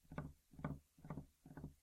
Fingers tapping on a bench.
foley, tapping, fingers-tapping, tap